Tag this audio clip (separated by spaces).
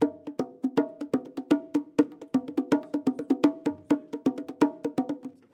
environmental-sounds-research drum bongo percussion